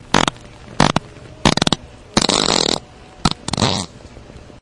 6 fart montage
fart, weird